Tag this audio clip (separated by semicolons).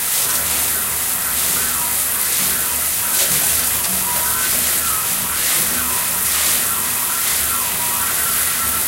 ambient; effect; field-recording; fx; noise; sample; sound